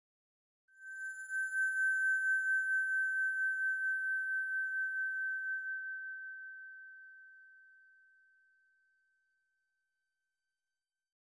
A high pitched ringing that sounds like it is coming from a crystal glass. Made with a digital synth for a reading of a horror story I wrote.
sound, effect, horror, synth, glass, piano, ring, suspense, sound-effect, fx, ringing, bell